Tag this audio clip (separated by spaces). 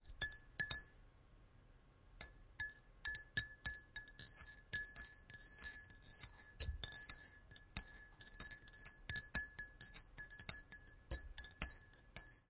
ambience coffee mix spoon stir